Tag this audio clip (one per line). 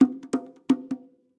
bongo
drum
environmental-sounds-research
loop
percussion